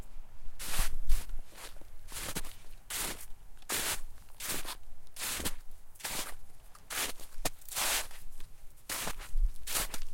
walking in snow